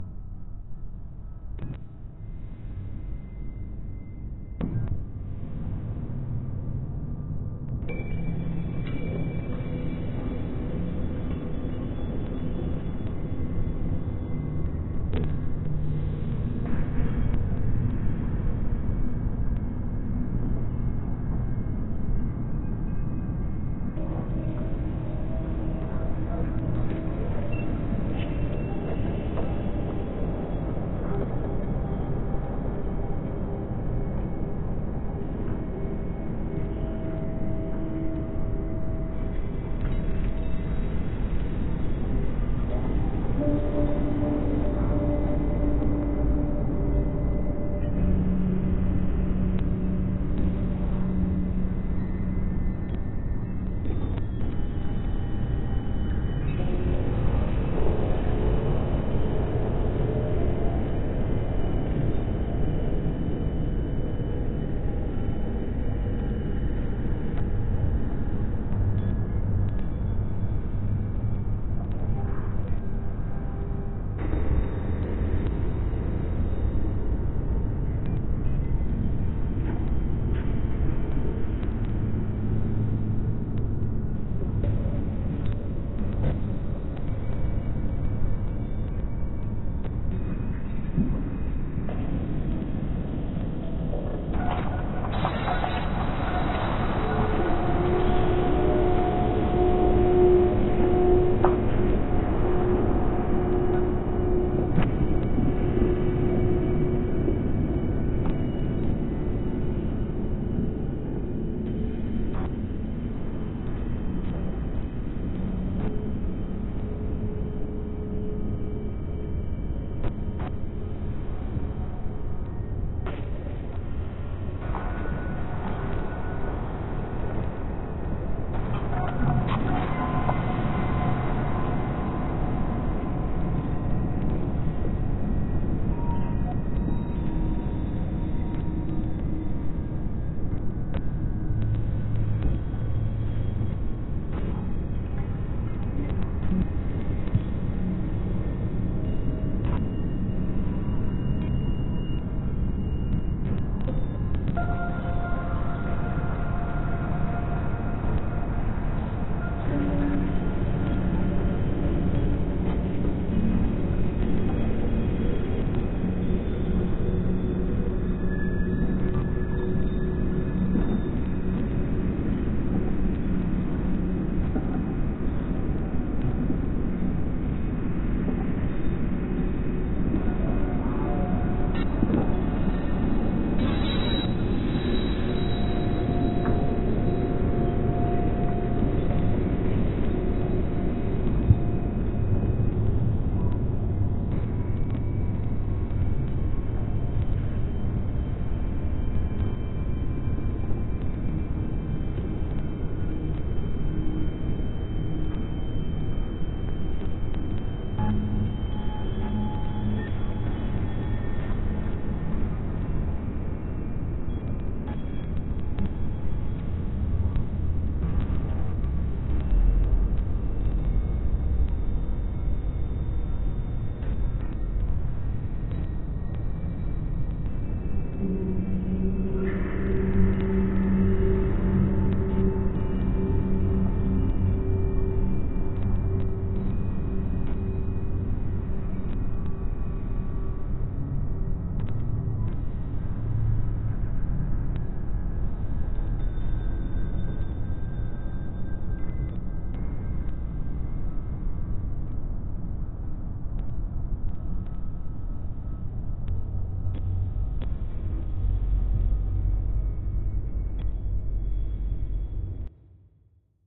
derelict-spaceship
Strange sci-fi ambience, lot's of details. Perhaps an old derelict spaceship slowly coming back to life?
alone, darkness, robot, space, spaceship, station